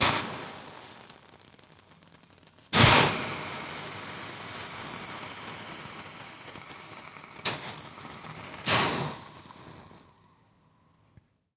Cold water splashes on hot plate
Dropping a series of splashes of water onto a hot plate.
plate, water, hot, splashes, steam, cold, splishes, steamy